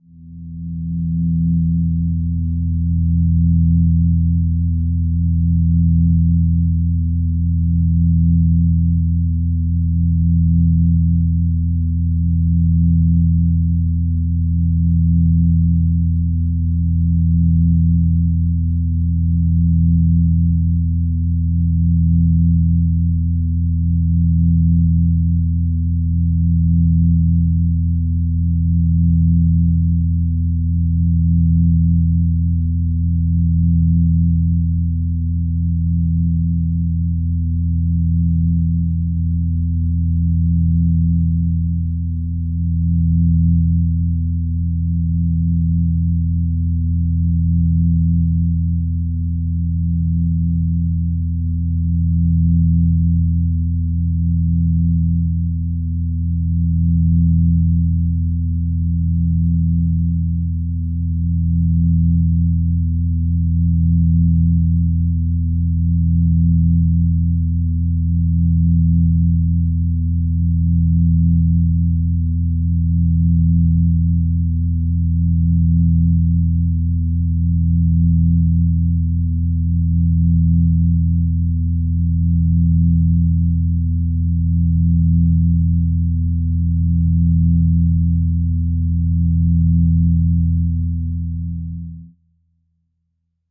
A Phase drone sound in the key of A. Made in ZynAddSubFX, a software synthesizer software made for Linux. This was recorded through Audacity 1.3.5 beta, on Ubuntu Linux 8.04.2 LTS.I have added a little more echo on this sample.
a, drone, synthesizer